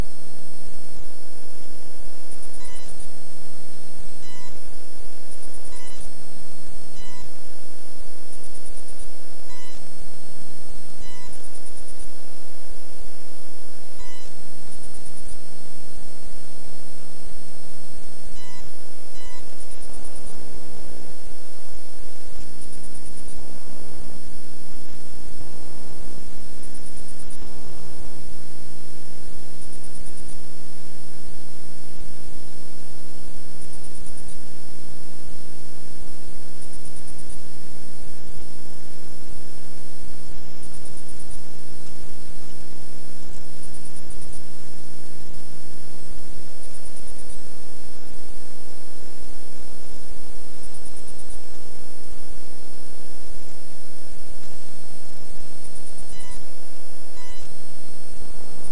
laptop hardware (?) noise. what a bad sound card produces while trying
to record something with its default microphone... or something similar. oh, the sounding at the beginning and ending of the file is what happened when a window was opened or closed.
electronic, noise, computer